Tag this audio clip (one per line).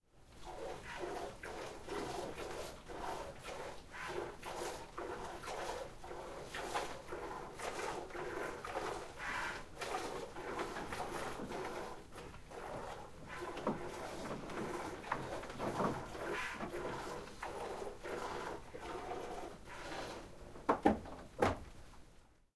seau
Milking
traite
traire
ch
bucket
plein
vre
milk
plastic
plastique
lait
full
Goat